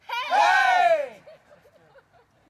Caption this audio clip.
Group of people - Screaming Yeaaaah - Outside - 05
A group of people (+/- 7 persons) cheering and screaming "Yeeaah" - Exterior recording - Mono.